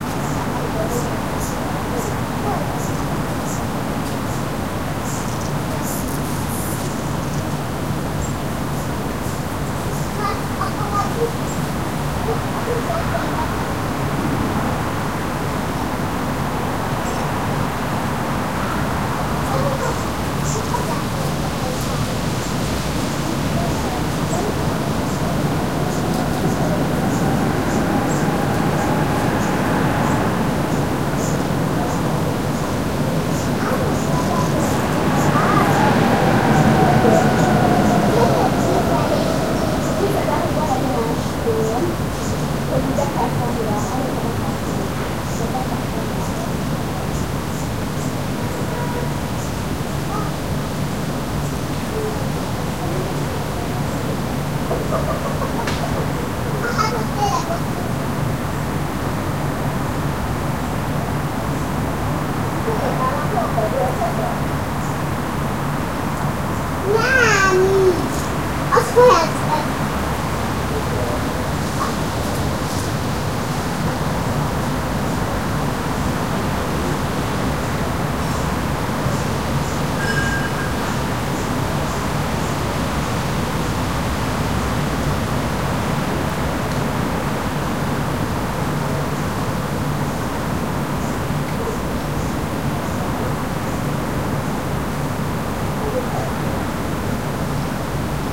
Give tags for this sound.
Surround Travel City Movie Cars Film Public Transport Park Field-recording Street Europe Wind

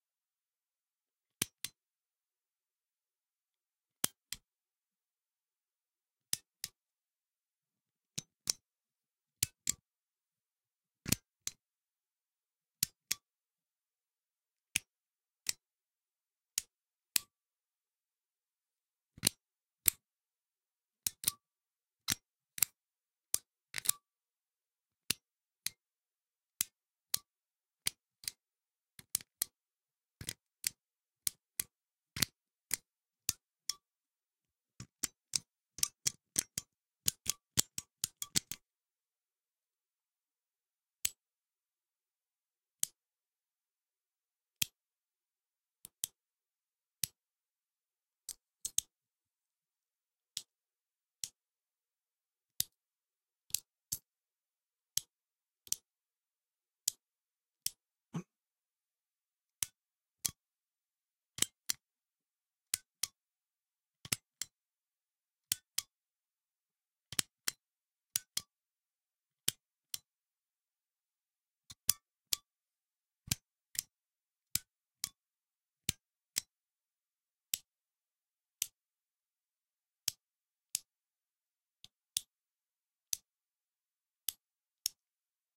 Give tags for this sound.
on-off Light switch

